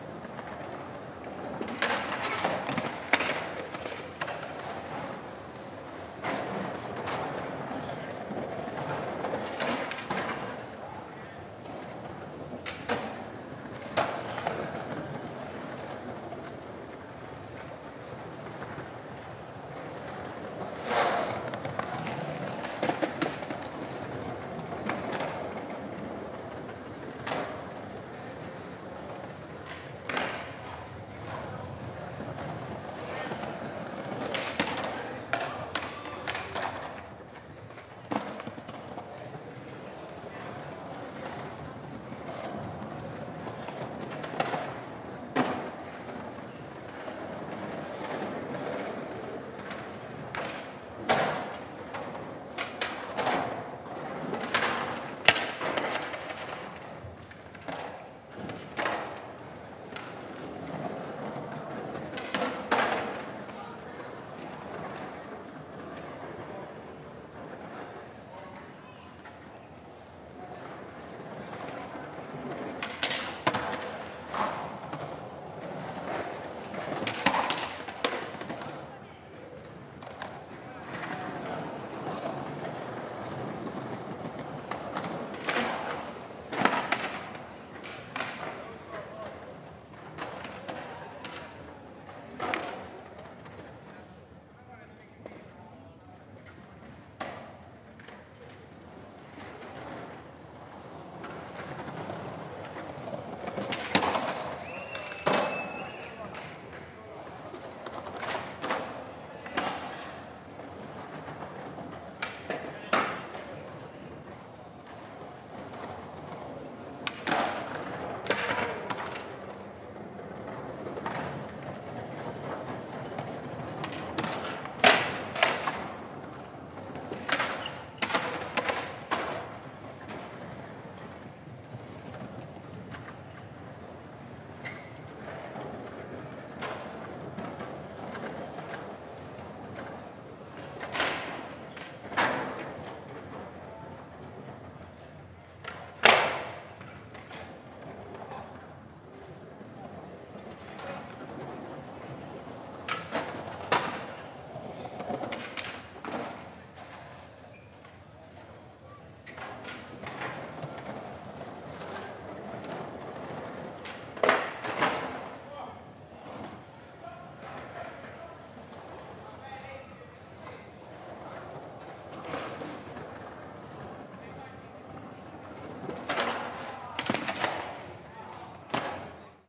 An atmos recording of Southbank skatepark during the daytime in summer.
A credit for the use of this would be appreciated but you don't have to.
If you would like to support me please click below.
Buy Me A Coffee